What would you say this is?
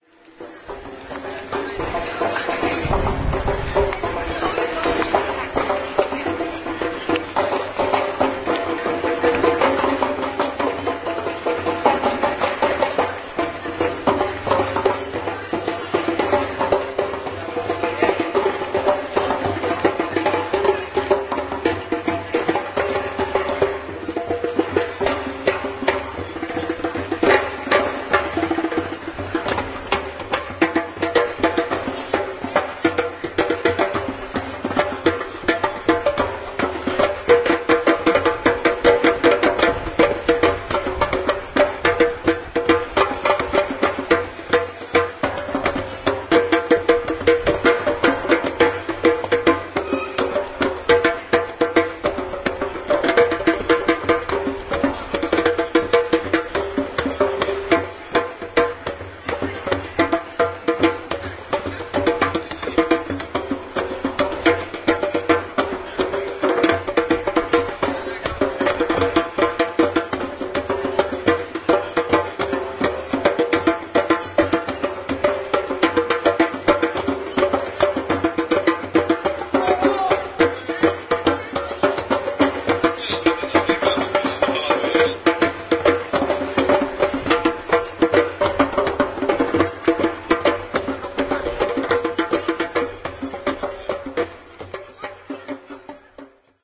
04.09.09: about 21.00, Old Market in Poznań/Poland (near so called Pręgierz - Pillory). Four young people (3 men, 1 woman) are drumming. Fifth man is scraping some money.